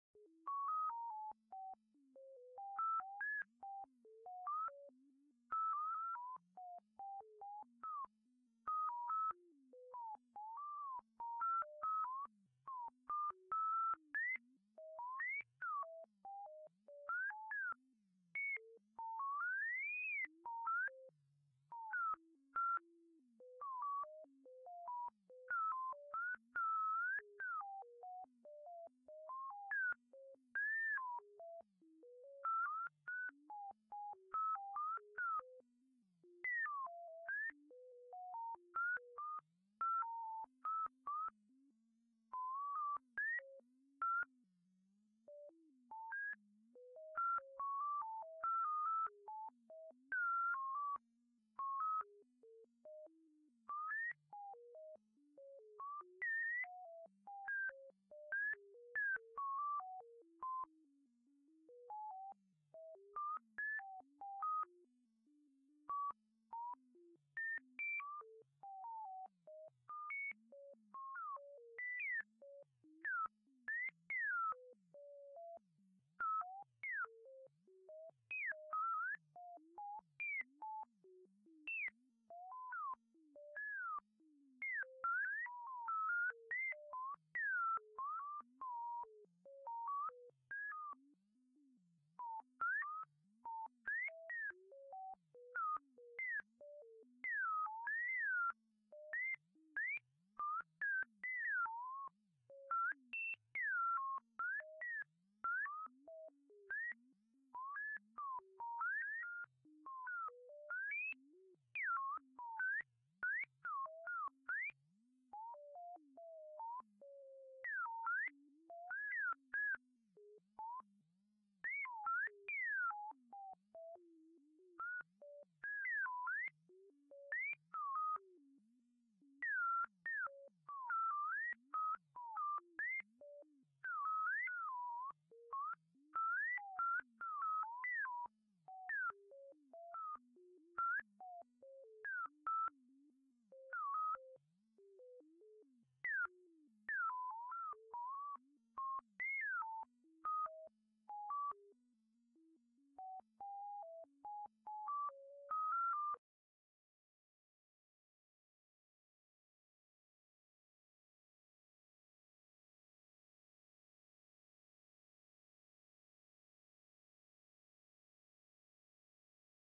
This sounds are created by me with synthesizer. Very similar to Star Trek computer sounds.
fiction, computer, sounds, spaceship, star, trek